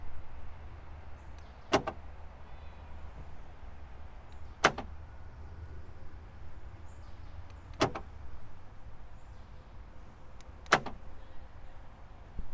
Car lock and unlock
locking and unlocking the door of a car
car, door, lock, locking, unlock, unlocking